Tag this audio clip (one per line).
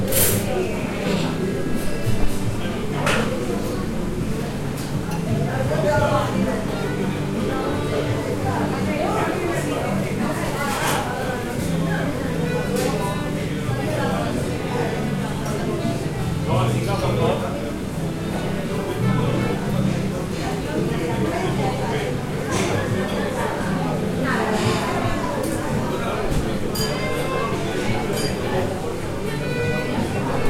ambience
cars
city
field-recording
people
restaurant
street